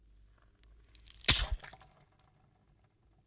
Very quick Splash and squishy sound
Dirty sounding squishing sound made with an orange being pressed very close to the mic. it instantly explodes. Used for exploding brains.
Long, Splash, blood, brain, dirty, effect, exploding, fruit, gross, slush, sound, splat, splatter, squick, squish, squishy